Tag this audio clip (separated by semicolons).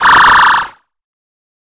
electronic; soundeffect